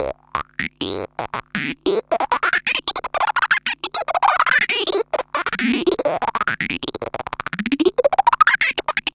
These samples come from a Gakken SX-150, a small analogue synthesizer kit that was released in Japan 2008 as part of the Gakken hobby magazine series. The synth became very popular also outside of Japan, mainly because it's a low-cost analogue synth with a great sound that offers lots of possibilities for circuit benders.